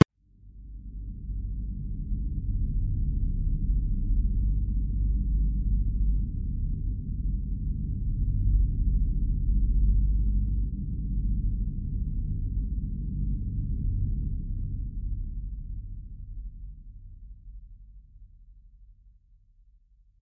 low atmosphir
suspended sound low key for Fatal scenes
atmosphir, low, music, suspended